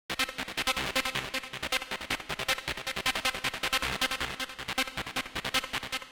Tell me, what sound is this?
little bit more
synth riff i used for a hard style track worked,worked very well
synth, trance, lead